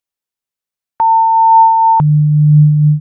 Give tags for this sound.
150Hz 19000Hz 900Hz amplitude-modulation rgb sinusoids synth synthesis tone

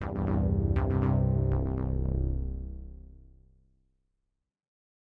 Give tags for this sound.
computer effect game mt-32 noise pc roland sfx sound video-game

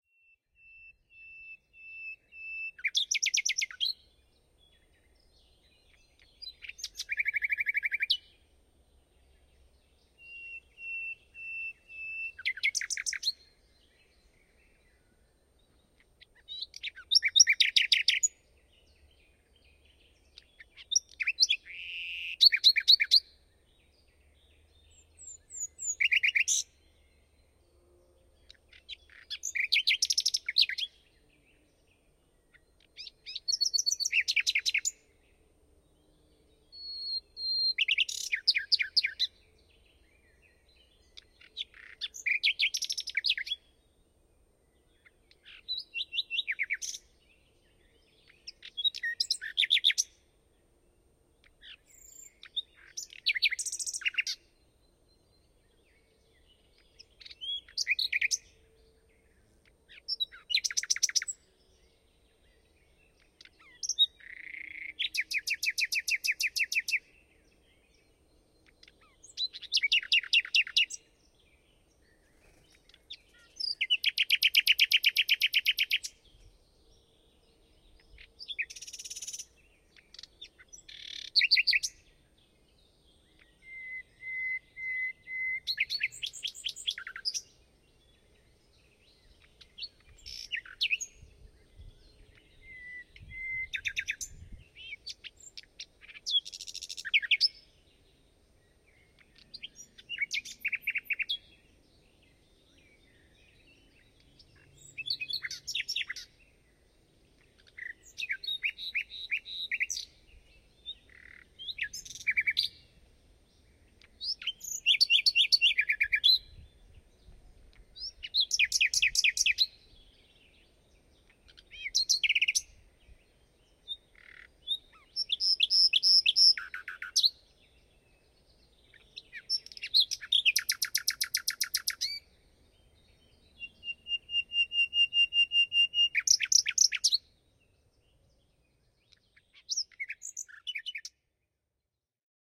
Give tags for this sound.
sornattergal luscinia-megarhynchos csalogany fulemule bird-song bird nachtigall nachtegaal nightingale sydlig-nattergal primavera slowik-rdzawy sydnaktergal rossinyol forest rossignol spring ruisenor-comun rossignol-philomele usignolo etelansatakieli rouxinol nature field-recording

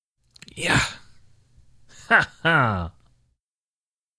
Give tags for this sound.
being content contentedness contentment delectation delight enjoyment full gladness gratification happiness human male man pleasure rested satisfaction vocal voice well wordless

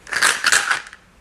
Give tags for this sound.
Container Pills Medic

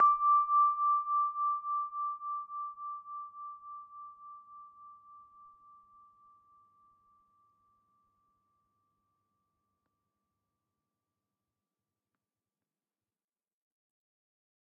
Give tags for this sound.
hifi crystal-harp

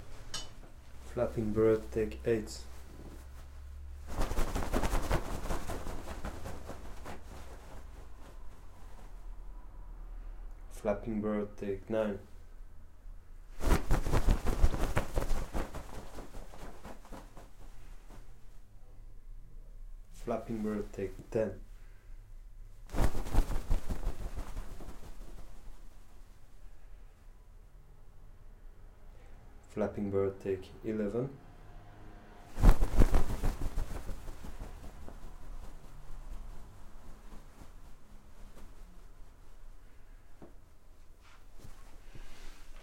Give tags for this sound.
foley mono bird flapping

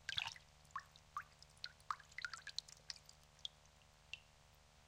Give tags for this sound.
drops water

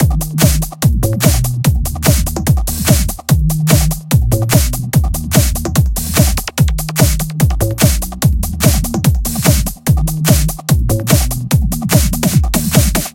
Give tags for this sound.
electronic sample synth techno

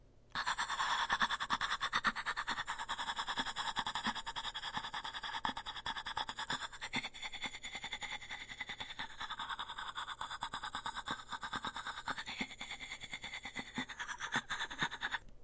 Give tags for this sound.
Ominous whispering spider ghost

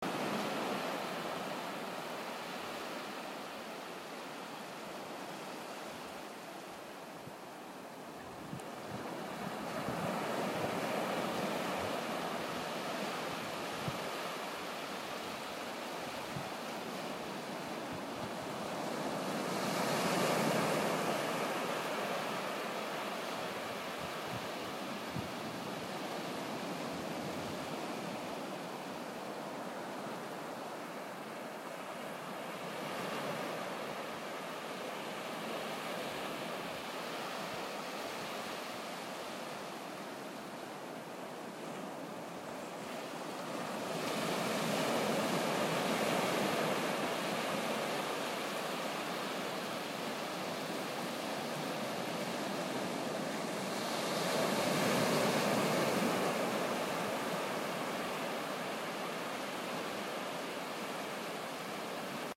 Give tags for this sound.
Ocean ocean-tide wild-atlantic-way

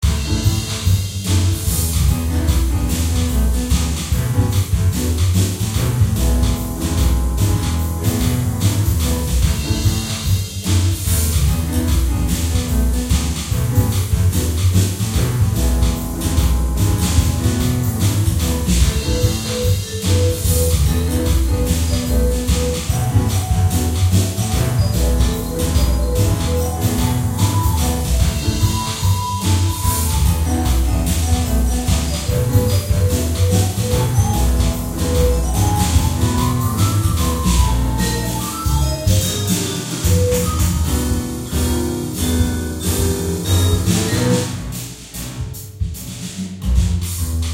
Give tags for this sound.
music jazzy

